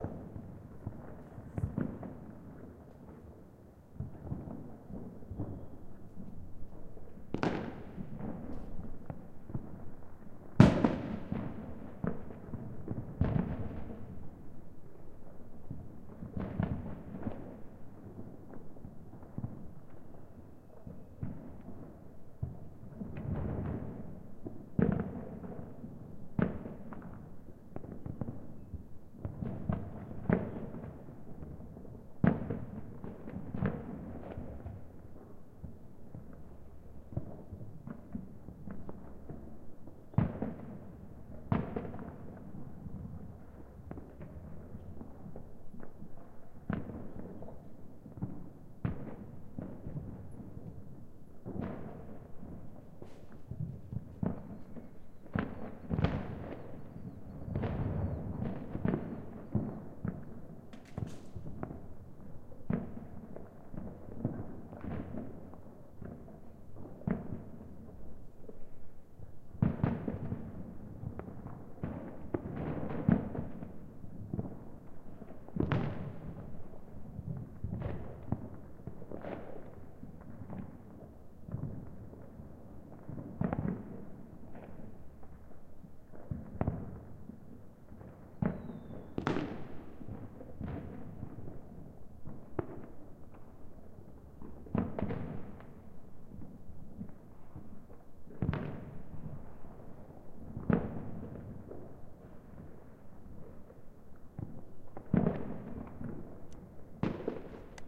New Year firecrackers 2
New year firecrackers, ambience record, first minutes 01/01/2019 MX
new-year, fireworks